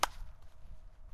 Bat Hit 13 FF091
Baseball Bat hit distant with reverberation, Ext.
Baseball, Distant